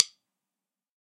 Drumsticks [Pro Mark L.A. Special 5BN (hickory)] №2.
Samples of these different types of hickory drumsticks was recorded with Reaper and M-Audio FireWire 410 sound card.
All these sounds was picked-upped by AKG D5 microphone.
1. Pro Mark L.A. Special DC (March sticks);
2. Pro Mark L.A. Special 5A (hickory);
3. Lutner Woodtip 7B (hickory);
4. Pro Mark L.A. Special 5B (hickory);
5. Lutner Rock N (hickory);
6. Lutner 2BN (hickory);
7. Pro Mark L.A. Special 5BN (hickory);
8. Pro Mark L.A. Special 2BN (hickory);
9. Pro Mark L.A. Special 2B (hickory);
10. Lutner 5A (hickory).
2B, 2BN, 5A, 7B, A, blocks, clicks, DC, drum, drumsticks, hickory, L, Lutner, March, Mark, metronome, nylon, Pro, Pro-Mark, ProMark, RockN, samples, Special, sticks, tips, wood